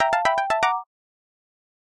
Kinda Creepy Ringtone
Just loop it to get a ringtone! I imagine this would be creepy if heard while in a big house when you're all alone.
creepy, horror, phone, ringtone, scary